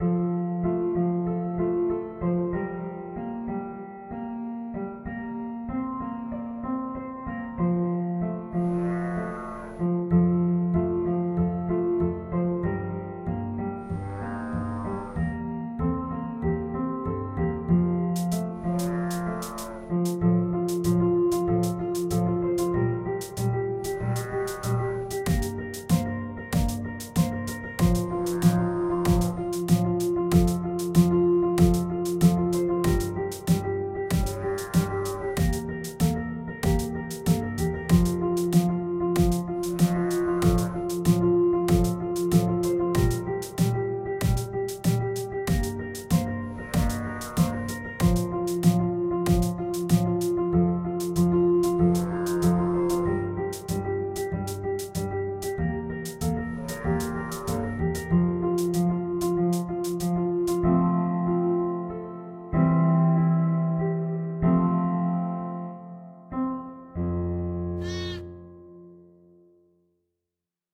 Stepper - Piano Music with drums and a cow
There's Moos and drums and piano in this music.
Loop version available too.
Cheers!
cow, moo, bgm, game, happy, rubbish, end, humor, bright, reverb, music, finalize